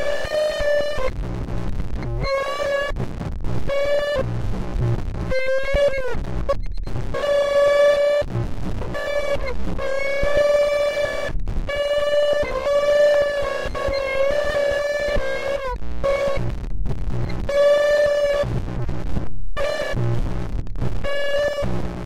alien robot sound, sounds like its name to me, like some noise that came out of star wars or some sci fi movie anyway hope its is usefull peace!
field-recording, home-recording, pro-tools